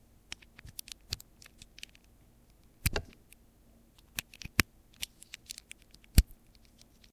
USB Cable Connect And Disconnect (Plug)
Connecting and Disconnecting USB Mini connector to my Sansa.
cable, connect, disconnect, interaction, interface, plug, unplug, usb